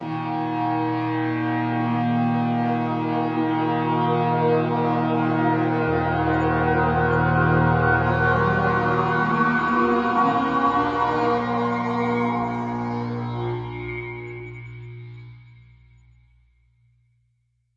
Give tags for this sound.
Piano Cinematic Atmosphere Looping Sound-Design Loop Ambient Pad

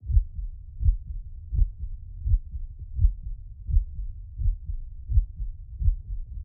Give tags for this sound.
panic heart-beat stethoscope human

